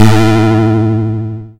Cartoon, Dizzy 06
Cartoon, Dizzy
This sound can for example be used in cartoons - you name it!
cartoon, character, nostalgia, hit, obstacle, nostalgic